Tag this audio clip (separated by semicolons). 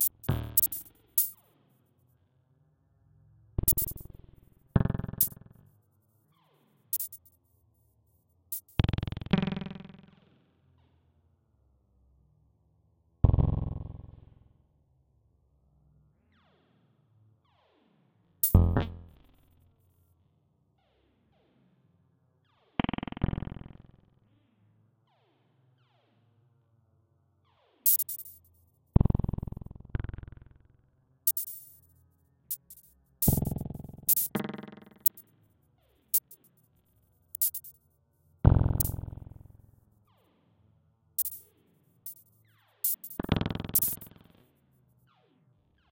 ambient; analog; idm; pd; rare